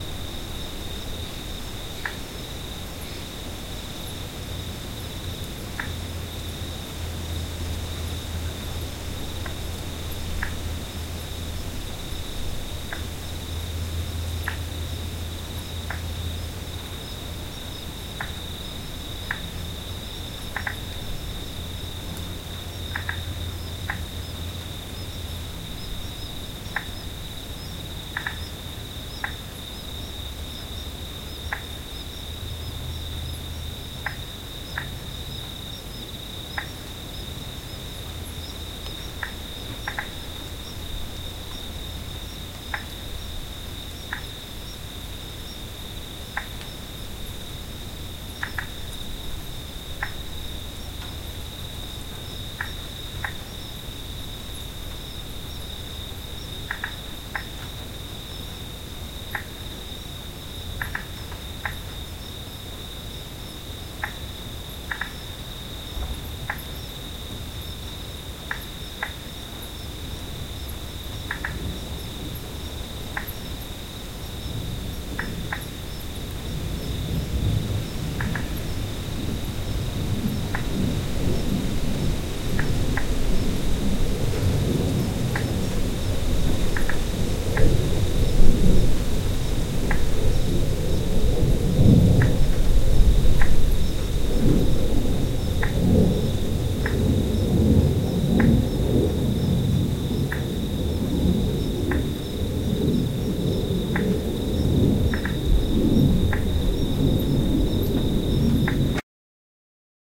4 seasons Fryers 27 March 2013 #001
Sample No.#1. This is part one of a larger project the working title is "12 Months 24 nights". An atmos of Fryers Forest. Recorded 10pm 27th March 2013.
Recorded with a Rode NT 4 stereo mic into aZoom H4n.
atmos, field-recording, nature